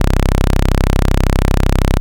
Waveform SAW
This is my synth saw (Novation x-station)
saw, waveform